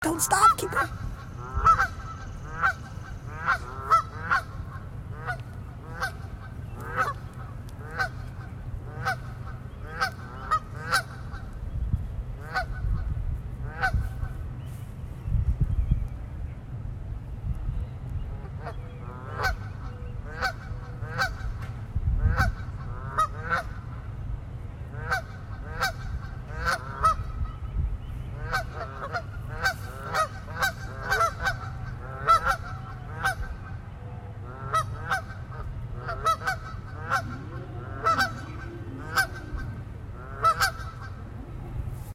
Geese honking
geese, goose, honk, honking, pond, quack